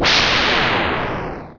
This sound is suitable to mix in a fading tail of a commercial jingle
flanger, jingle, noise, phase, radio, tail